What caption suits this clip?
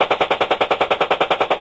m230 chain gun burst 1
schuss, army, pistol, sniper, weapopn, canon, patrone, military